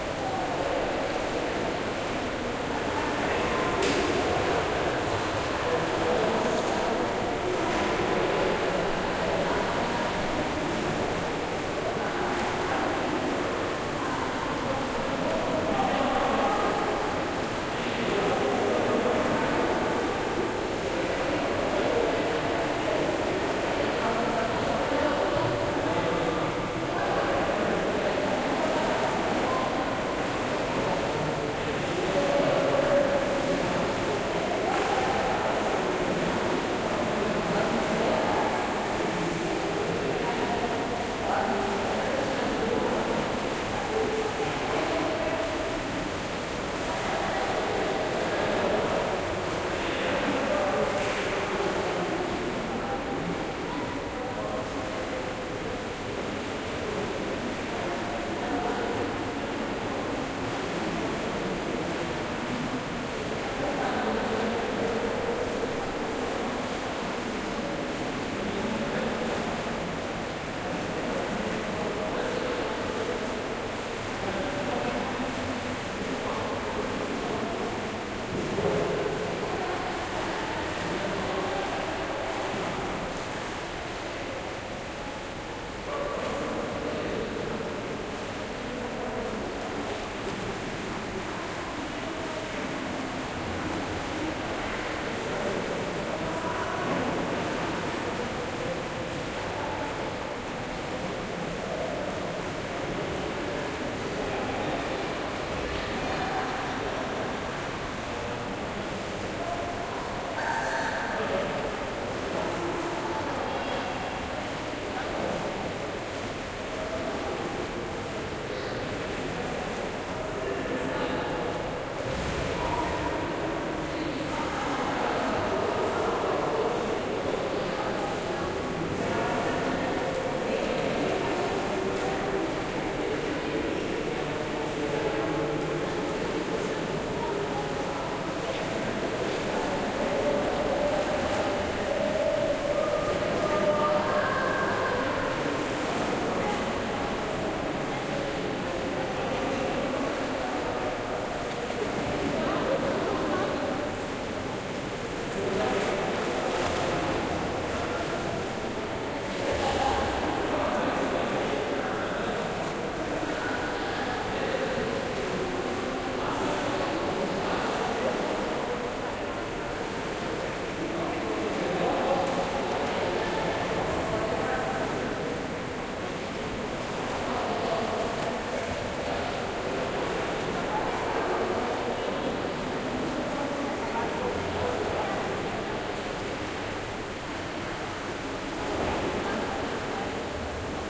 Swimming pool , indoor, large
Strasbourg old municipal baths in the main swimming pool.
Very large
1 boom schoeps Mk 41
2 and 3 Stereo Schoeps ortf
pool, strasbourg, indoor, municipal, baths, swim, swimming